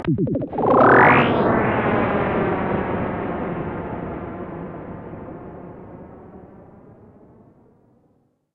This is a flashback-ish tail blip to use in broadcast jingles